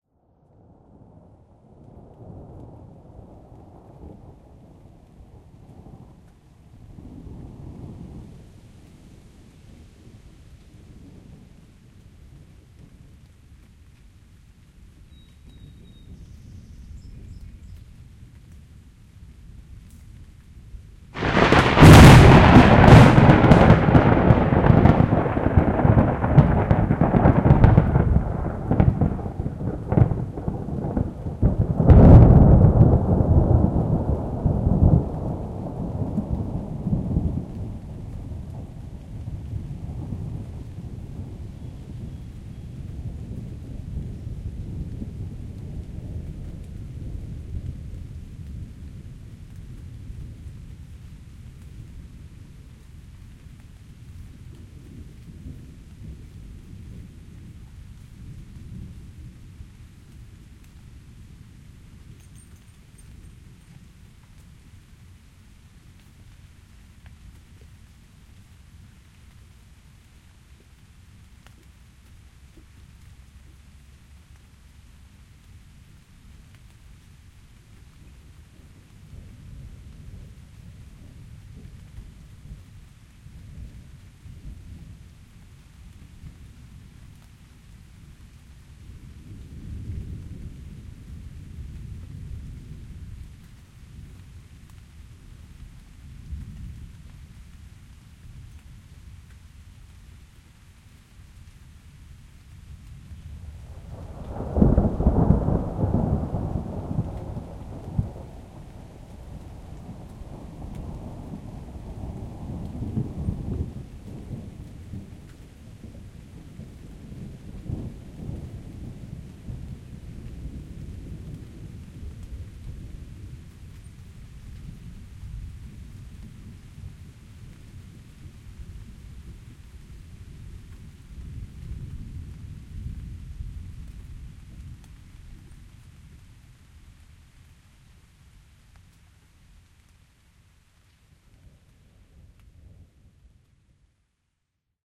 20160724 loud cloud
Decent thunder from near by lightning strike
clap, lightning, rain, storm, thunder, thunderstorm, weather